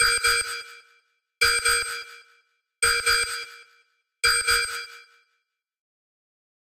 future alarm 2
A future sounding alarm, recommended for space ships / robots.